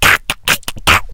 A voice sound effect useful for smaller, mostly evil, creatures in all kind of games.
arcade, creature, fantasy, game, gamedev, gamedeveloping, games, gaming, goblin, imp, indiedev, indiegamedev, kobold, minion, RPG, sfx, small-creature, Speak, Talk, videogame, videogames, vocal, voice, Voices